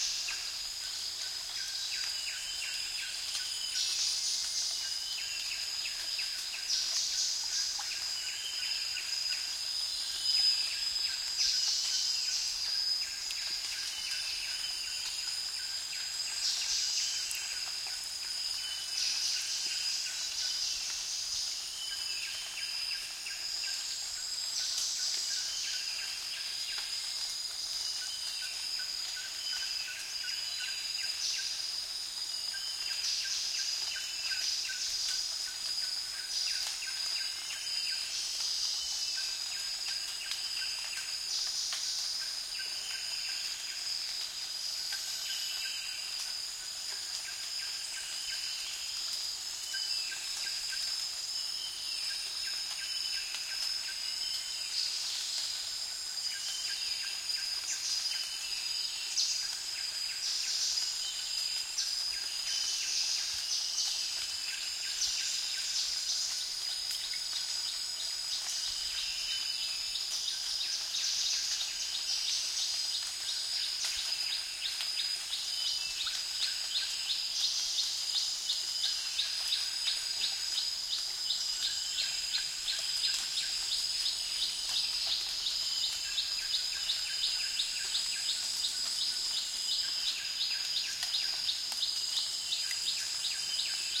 Thailand jungle morning crickets, birds echo +water drops on plants1 more natural
Thailand jungle morning crickets, birds echo +water drops on plants more natural
birds, crickets, drops, field-recording, jungle, morning, Thailand, water